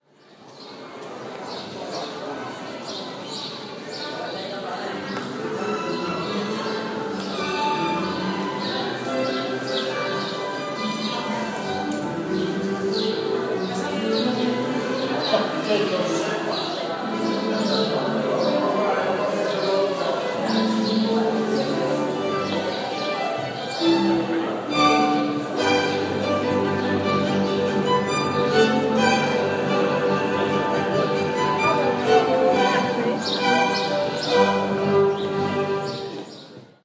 Cello And violin Central Park Tunnel
Cellonist an violinplayer in Central Park playing under the walkway, lots of natural reverb
natural, field-recording, city, birds, central, new, park, people, walking, nyc, ambience, reverb, york